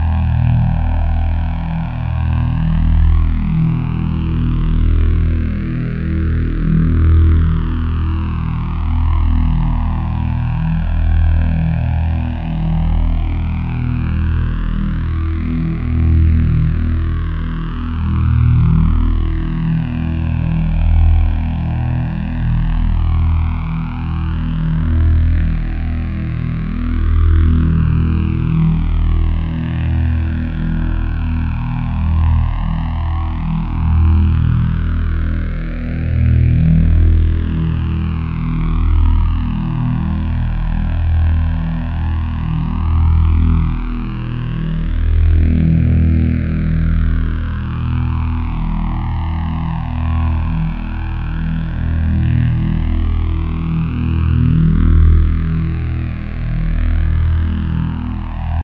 Saber humming
A pure synthetic loop made from a sine.sawtooth VCO. This a crossfaded infinite loop I use as a humming background in my S.W. LightSaber replicas. Rich in bass & ring-phased choruses.
saber, bass, electronic, humming, sabrolaser, loop, lightsaber